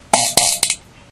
fart poot gas flatulence flatulation explosion noise
flatulence, poot, flatulation, noise, fart, gas, explosion